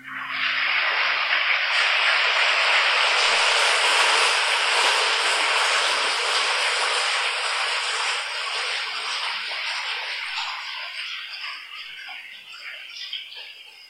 empty-toilet-cistern
Empty the toilet cister. Water sound.
cadena, empty